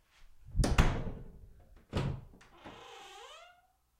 Door Open and Close 4
Door opening and closing, 3 mics: 3000B, SM57, SM58
door, slam